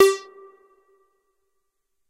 MOOG LEAD G
moog minitaur lead roland space echo
echo, lead, minitaur, moog, roland, space